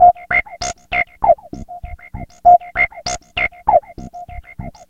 reso squawk loop
Just a shrilly pattern on one note with cascading echoes. Unprocessed output of a JP8000.
shrill, rhythmic, synth, jp8000, echo, loop